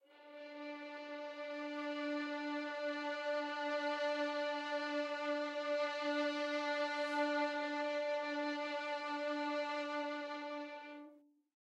One-shot from Versilian Studios Chamber Orchestra 2: Community Edition sampling project.
Instrument family: Strings
Instrument: Violin Section
Articulation: vibrato sustain
Note: D4
Midi note: 62
Midi velocity (center): 63
Microphone: 2x Rode NT1-A spaced pair, Royer R-101 close
Performer: Lily Lyons, Meitar Forkosh, Brendan Klippel, Sadie Currey, Rosy Timms
d4, midi-note-62, midi-velocity-63, multisample, single-note, strings, vibrato-sustain, violin, violin-section, vsco-2